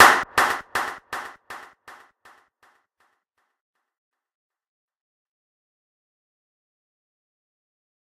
Clap 3 - Reverse Reverb and Delay
Clap, Delay, Reverb, ZoomH2